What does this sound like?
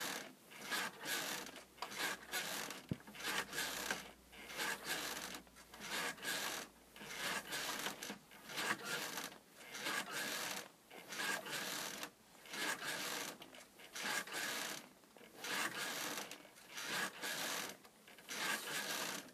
Bed Creak Slow
The sound of the bed springs creaking as I rocked back and forth. Could be used for music tracks or video where bed springs creaking would be appropriate. Possibly sounds like sex?
This is a slow rhythm.